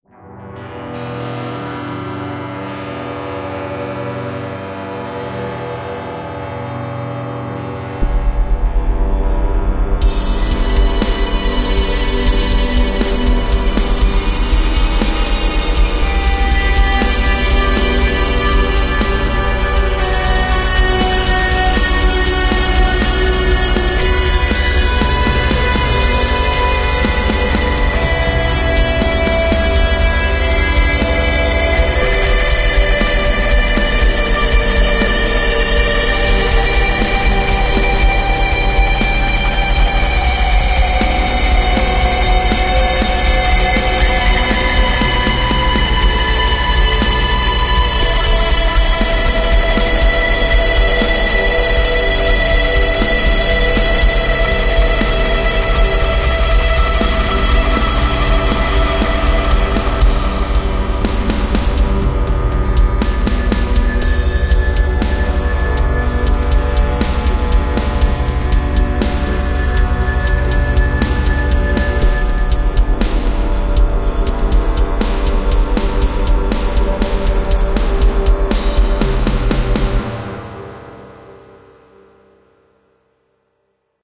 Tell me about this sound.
Made some ambient stuff